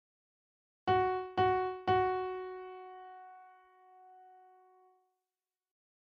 F Sharp Piano Sample
piano, sharp